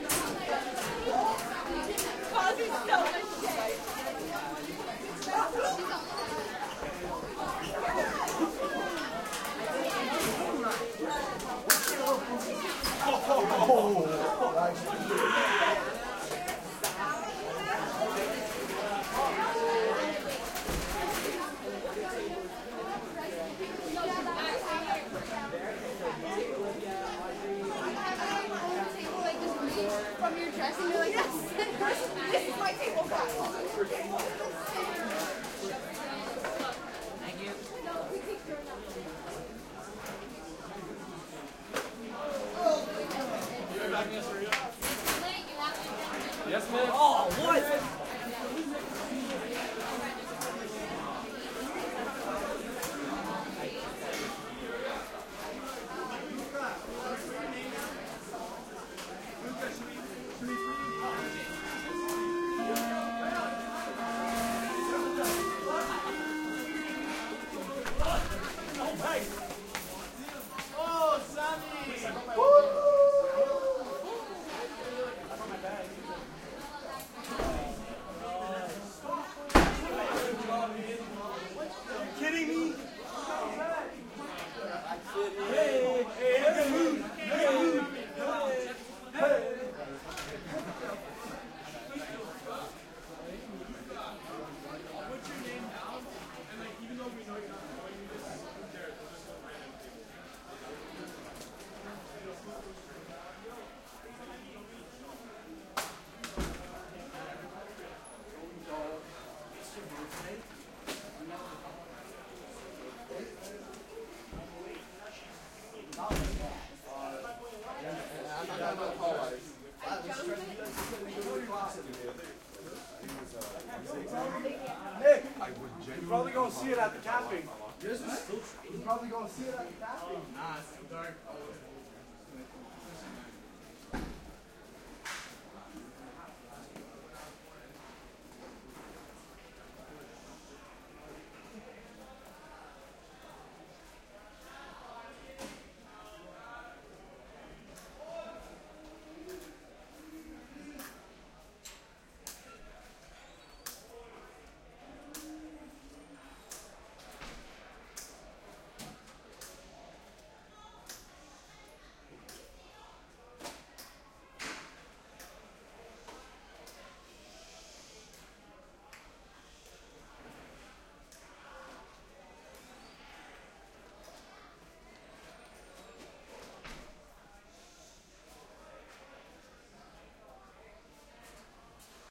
crowd int high school hallway lockers medium busy